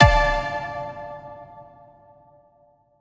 Tonal Impact D
Tonal impact playing the note D.
ableton, alternative, clandestine, drone, electro, E-minor, hip-hop, impact, layered, processed, tonal